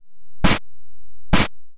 135 Moonshine club drums 01-clapsnare
bit, blazin, crushed, distort, gritar, guitar, synth, variety